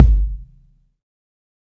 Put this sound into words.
Vegas kick 01
A mix of about 8 kicks.with compression & slight distortion. ÷%\;* done on an android in caustic.
bass, bassdrum, dnb, drum, hard, house, kick, kickdrum, techno